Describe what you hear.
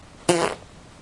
fart poot gas flatulence